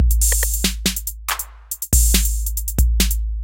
70 bpm drum loop made with Hydrogen
beat, electronic